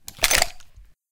Pistol Draw Unholster
Heres a cool pistol draw sound I recorded.
holster, Sound-Design, Weapon, Pistol, unholster